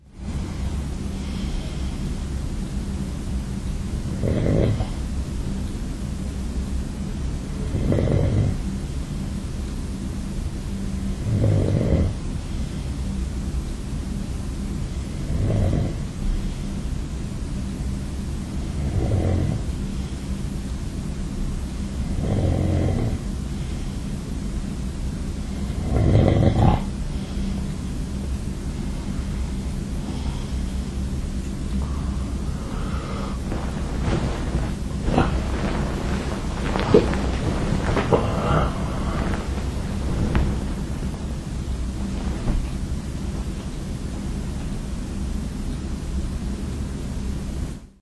Moving while I sleep. I didn't switch off my Olympus WS-100 so it was recorded.